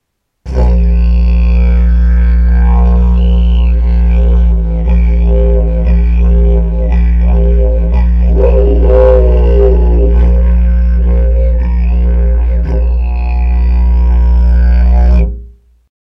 Didge Shower
This recording was taken with a Rode NT4 mic and with a Didge of mine in the key of B from northern Queensland (Kuranda).